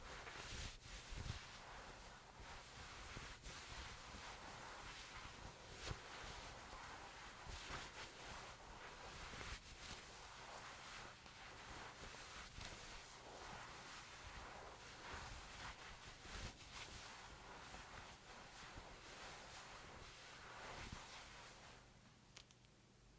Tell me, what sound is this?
Recorded with Rode VideomicNTG. Raw so you can edit as you please. the sound of clothes while someone is moving.